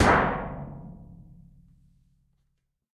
Plat mŽtallique gong f 2